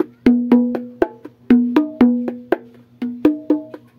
A common martillo variation influenced by some known bongoceros, notably Johnny "Dandy" Rodriguez